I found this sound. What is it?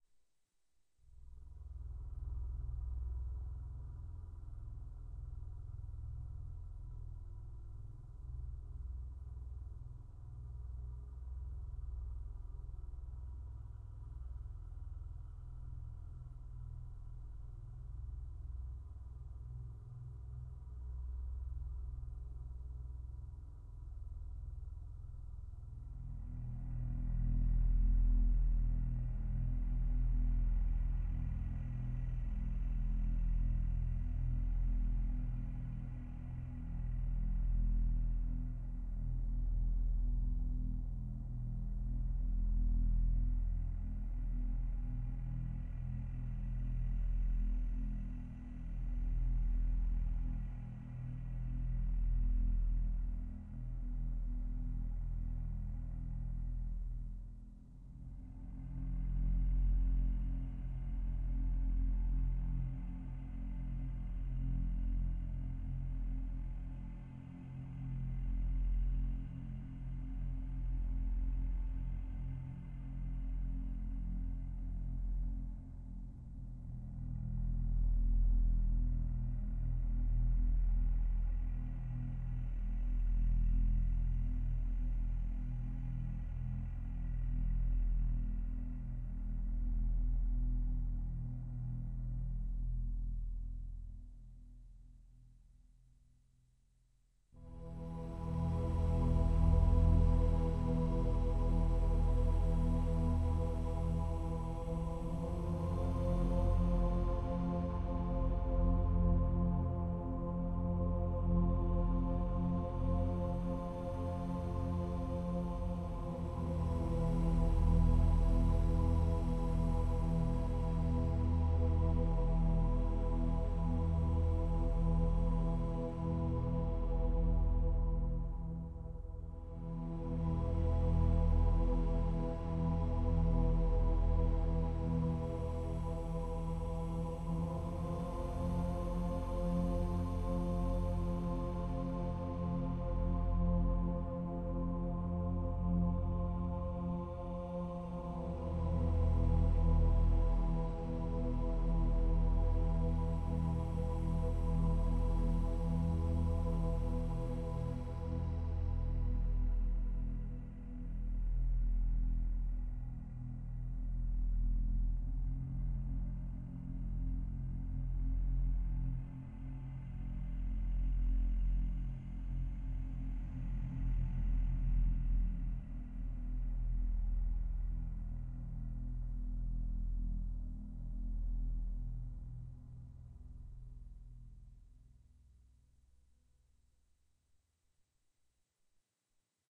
horror background #2
Horror music to play on the background of horror themed projects. created by using a synthesizer and Recorded with MagiX studio.
background, creepy, drama, haunted, horror, nightmare, scary, sinister, spooky, suspense, thrill